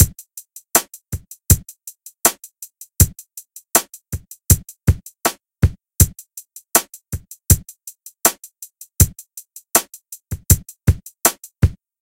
SMG Loop Drum Kit 1 Mixed 80 BPM 0096
80-BPM, drumloop